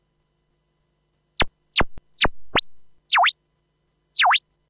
A goofy sound, like a lightweight puny laser being fired, good for games or cartoons. generated while playing around with the sweep knob of an old function generator.